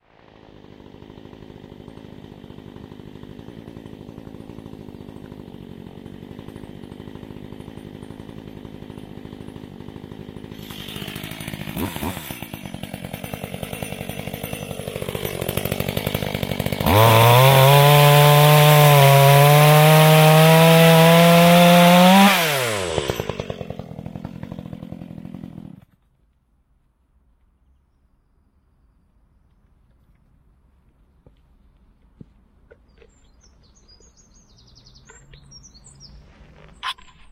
Makita-Chainsaw
Short sound of a Makita Chainsaw (Model: EA3200S)
Motor, Makita